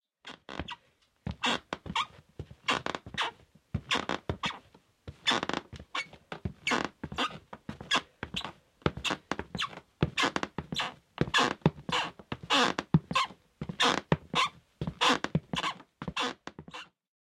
Man walking on squeaking wooden floor